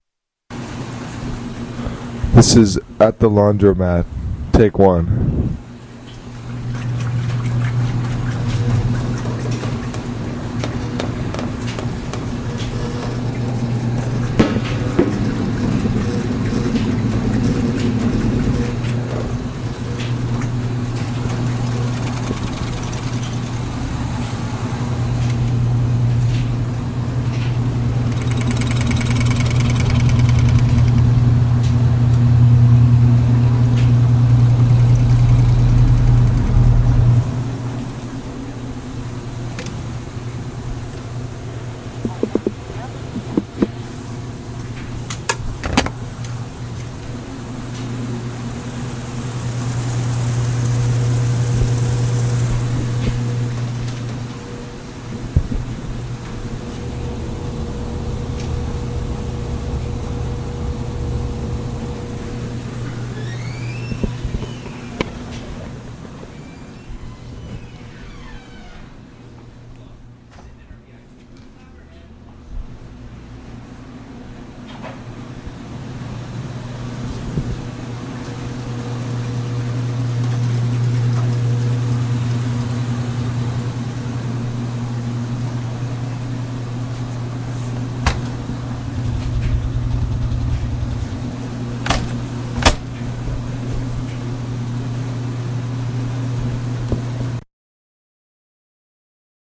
a sound recording from my local laundromat. Lots of rattling, swishing and metallic sounds.